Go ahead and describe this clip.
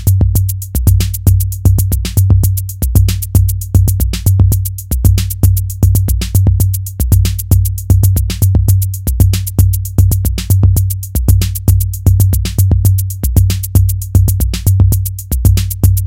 Kastimes Drum Sample 7
drum, drum-loop, drums, groovy, percussion-loop, percussive, quantized